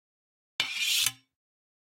Sliding Metal 08
blacksmith, clang, iron, metal, metallic, rod, shield, shiny, slide, steel